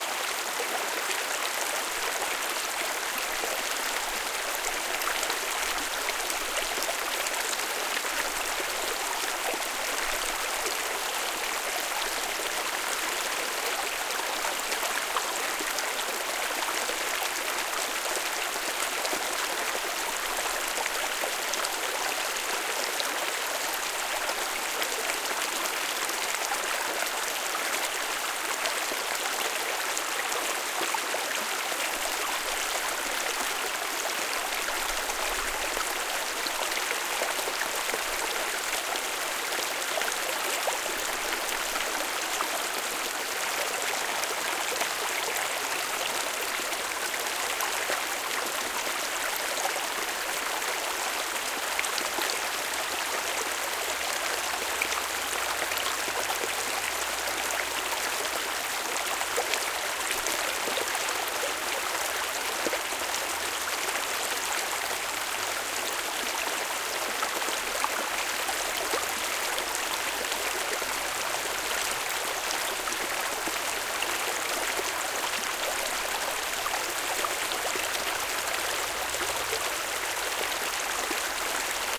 Rierol d'aigua al costat de santa fe de Montseny (Catalunya) enregistrat amb una zoom H6 micro XY a 120º